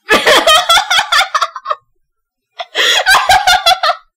i recorded my voice while watching and listening to funny stuff to force real laughs out of me. this way i can have REAL laugh clips for stock instead of trying to fake it.